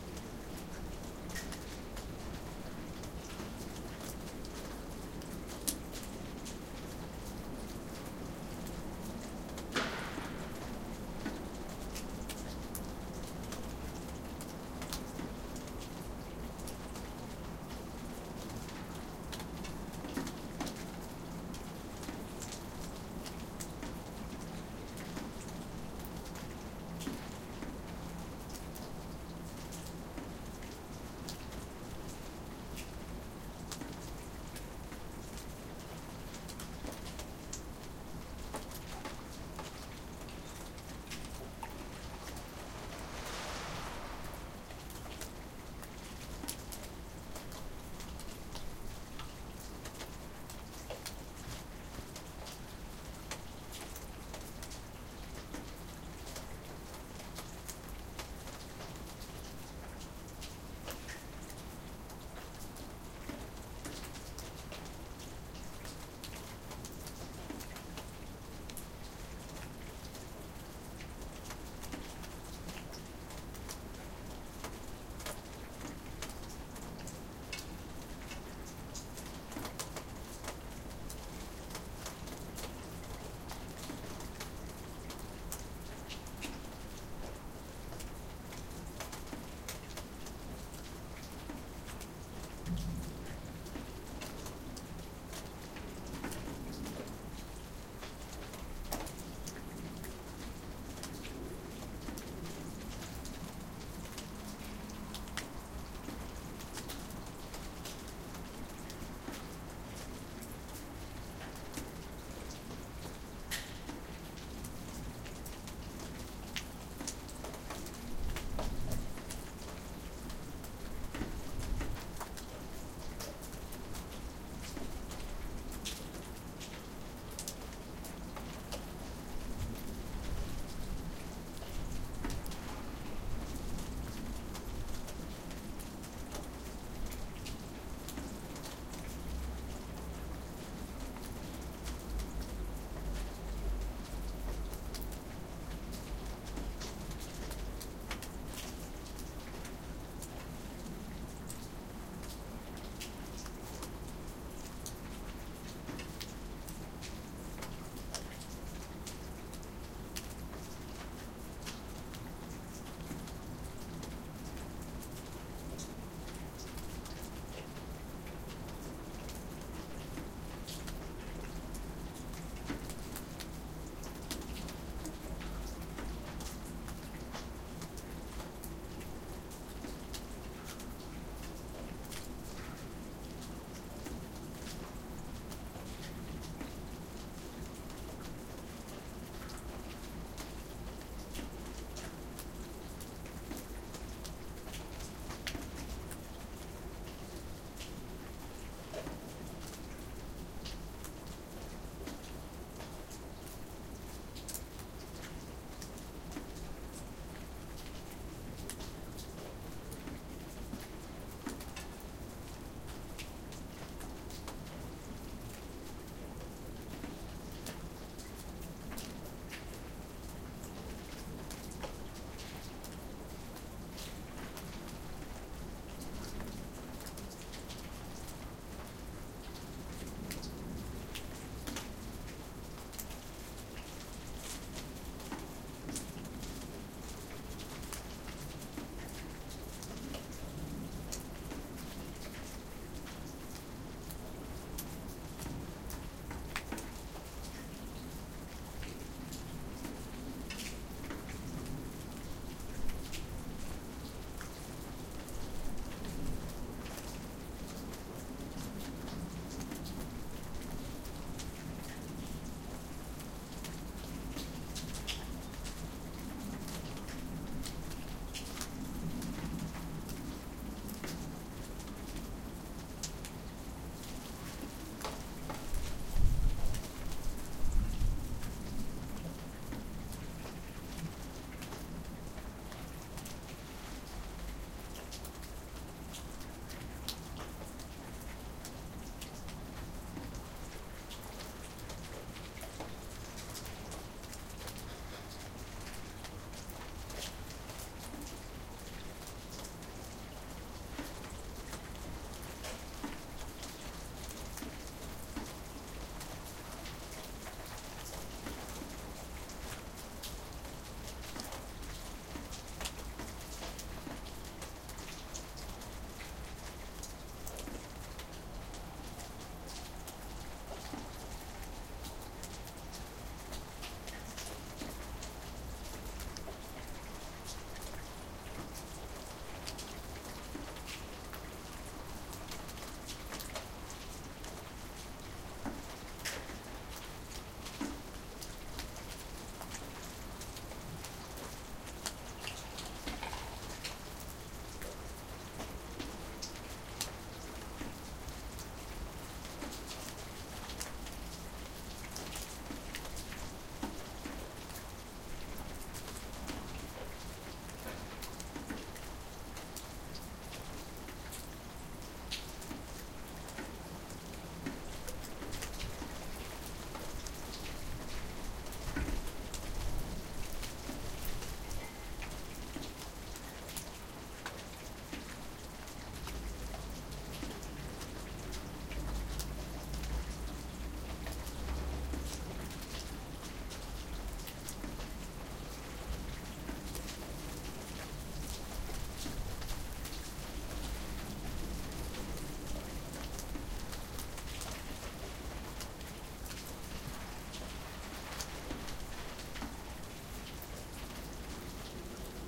After a cold spell it started to thaw and it rained. Wet and grey days are back again after snow and frost. Inside microphones of a Zoom H2 recorder with Rycote Windjammer.
snow-sliding-of-roofs, wet, thaw, field-recording, rain, raindrops